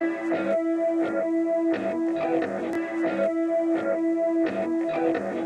Guitar chord randomly quantize
120, bpm, gtr, guitar, loop